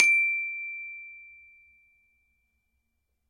Samples of the small Glockenspiel I started out on as a child.
Have fun!
Recorded with a Zoom H5 and a Rode NT2000.
Edited in Audacity and ocenaudio.
It's always nice to hear what projects you use these sounds for.
sample-pack, percussion, campanelli, metal, single-note, multisample, multi-sample, sample, recording, note, Glockenspiel, metallophone, one-shot